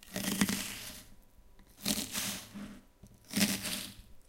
Cutting vegetables
Cutting some vegetables. ZOOM H1.